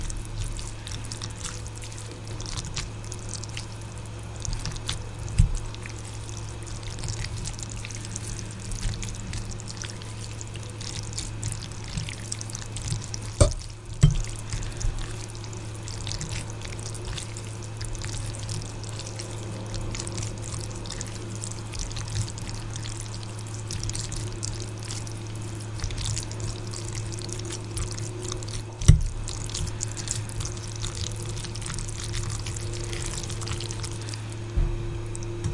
Ramen Being Stirred
The sound of cooked ramen in a bowl being systematically moved around said bowl. Recorded for my sound design class using a Blue Yeti microphone.
noodles, ramen